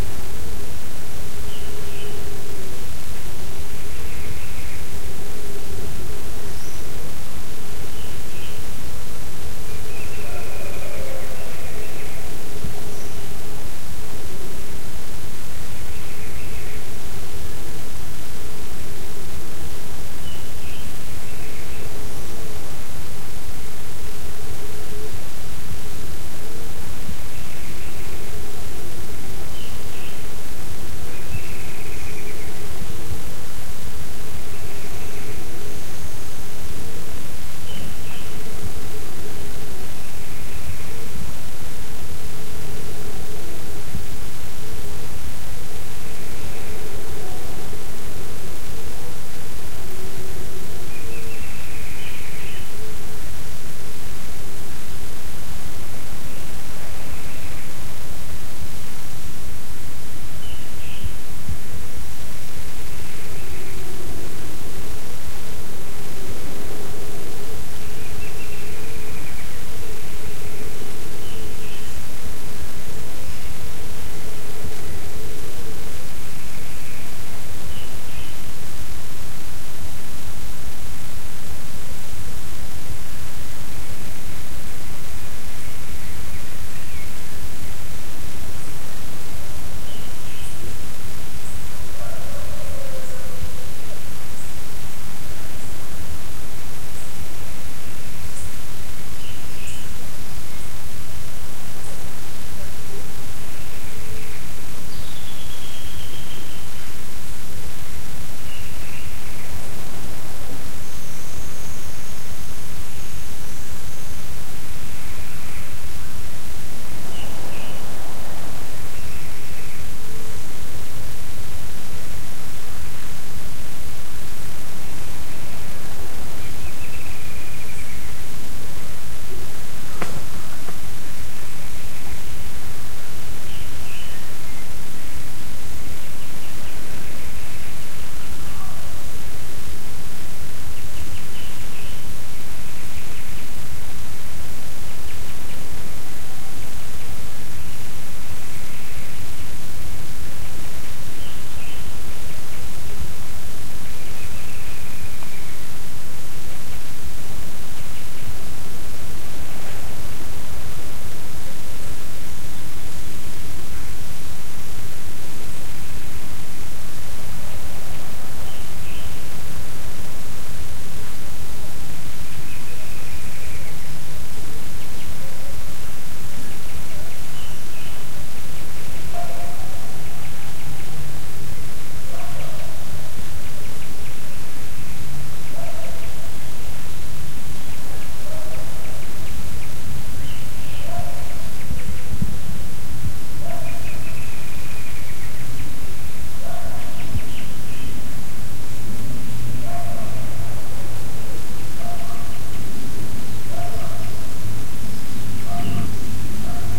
forest-birds03
Recording taken in November 2011, at a small forest in Ilha Grande, Rio de Janeiro, Brazil. Birds, wind and some movement can be heard. Recorded with a Zoom H4n portable recorder, edited in Audacity to cut out undesired pops and clicks.
ilha-grande, wind, nature, bird, forest, brazil, field-recording, rio-de-janeiro, birds